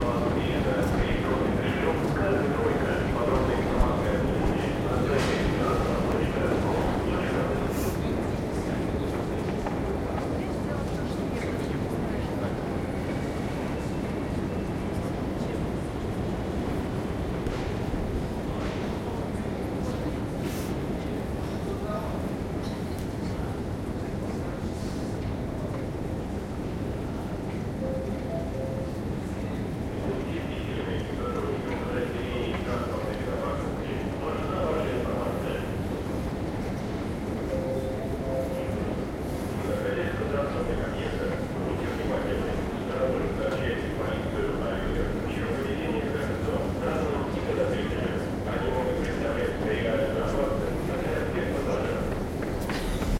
Some Moscow metro announcements from the escalator. Zoom H1
announcements, escalator, field-recording, metro, Moscow